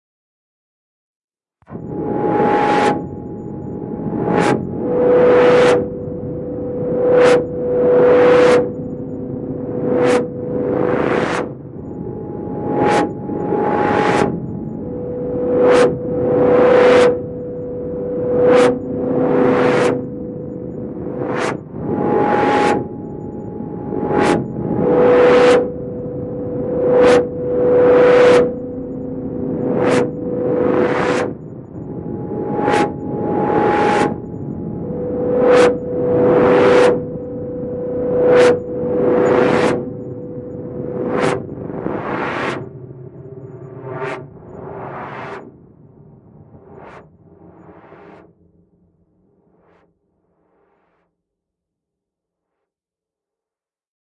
Sci-Fi Interference
Sound of an unusual interference in spacetime. Made with Studio One and all kinds of effects.
Game, Effect, Engine, Interference, Movie, Deep, SciFi, Alien, Sfx, Ship, Space, Fx, Menu, Film, Drone, Foley, Universe, Spaceship